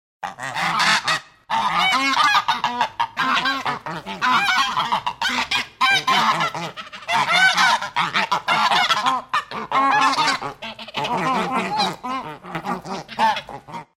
Honking of geese. Recorded by Sony PCM D-100, edited in Sound Forge and Adobe Audition.